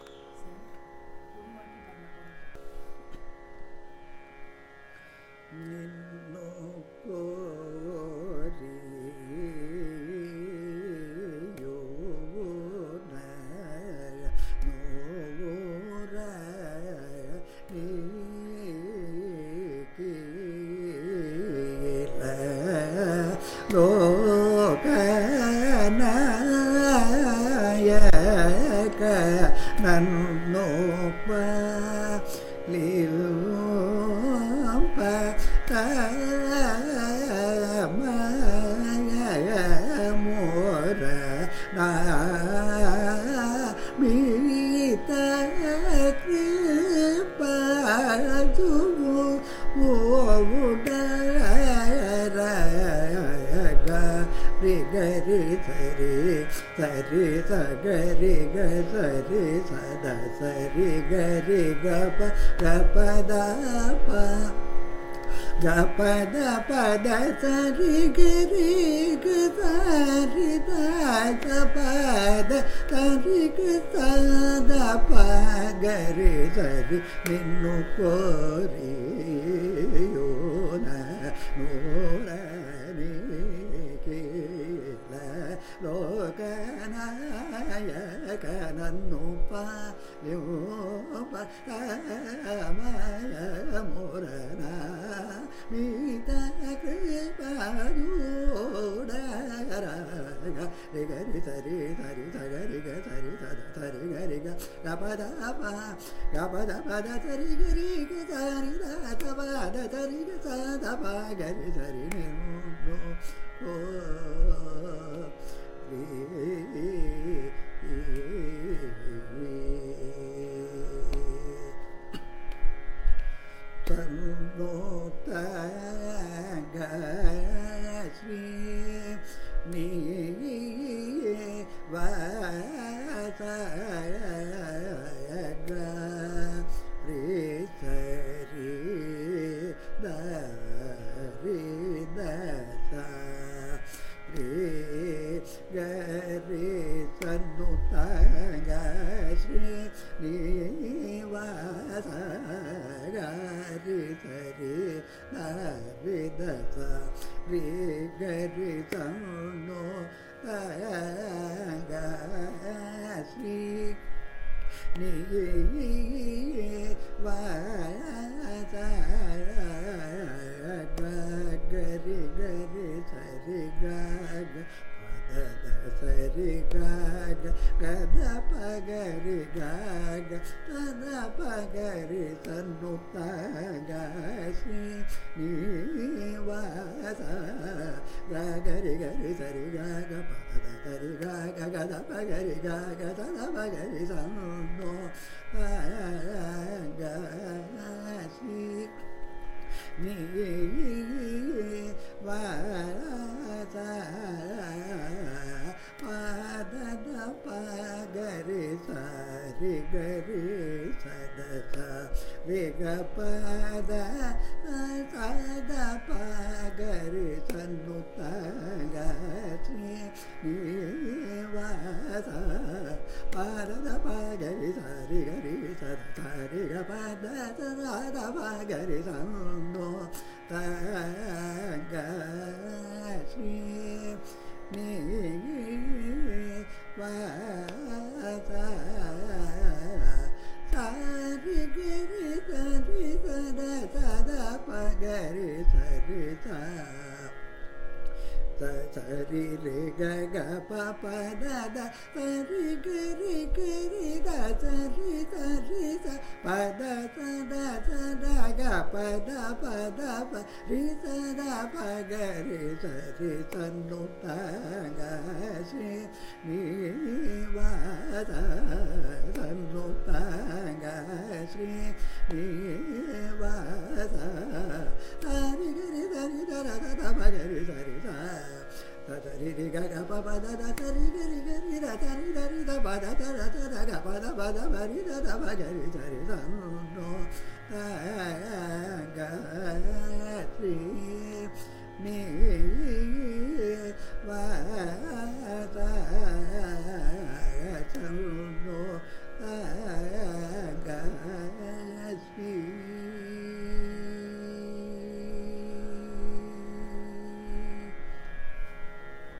Carnatic varnam by Badrinarayanan in Mohanam raaga
Varnam is a compositional form of Carnatic music, rich in melodic nuances. This is a recording of a varnam, titled Ninnu Koriyunnanura, composed by Ramnad Srinivasa Iyengar in Mohanam raaga, set to Adi taala. It is sung by Badrinarayanan, a young Carnatic vocalist from Chennai, India.